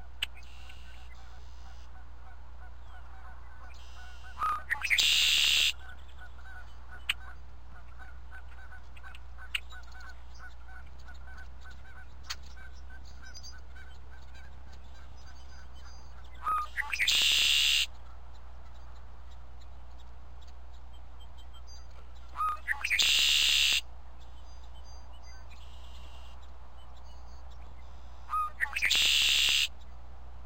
Red Wing Blackbird call
Red Wing Blackbird on Pennsylvania Farm - sat on top of Game Camera so the sound was clear - can also hear Geese Flying over and short Chirps of Blackbird
bird, Blackbird, Red-wing-blackbird